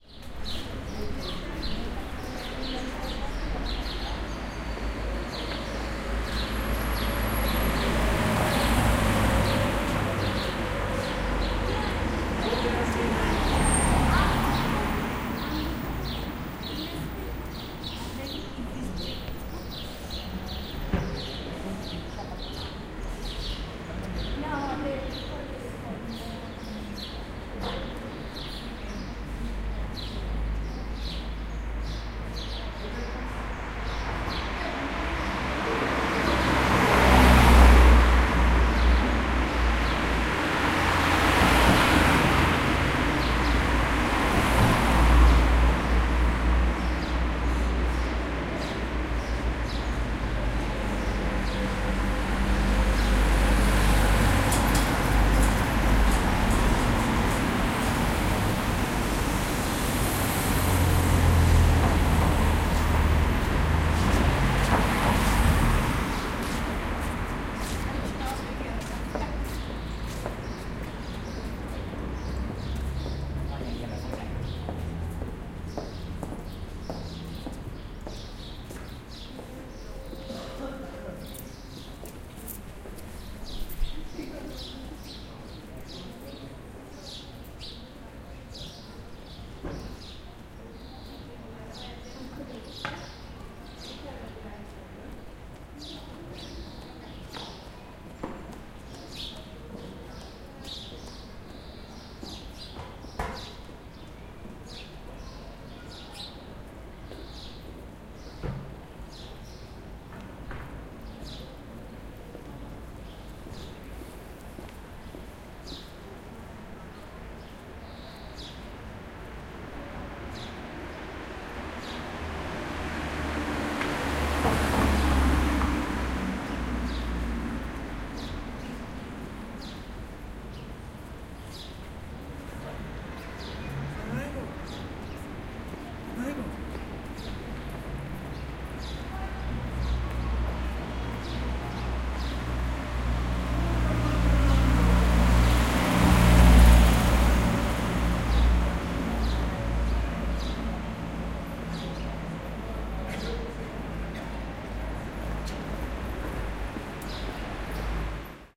0219 Street Gran Teatro
Traffic and birds. Some people walking and talking in Spanish.
20120324
birds, field-recording, traffic, voice, spanish, caceres, spain, footsteps